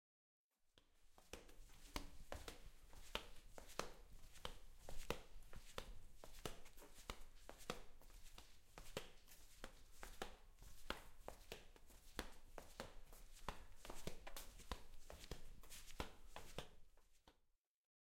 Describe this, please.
14 Walking in flip flops
Walking in flip flops